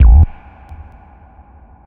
Deep acid sound, processed kick drum with low-pass filter, high resonance.
acid, alien, deep, low, reverb, sub